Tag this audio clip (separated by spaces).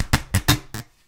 vol scratch noise natural zipper sounds 0 egoless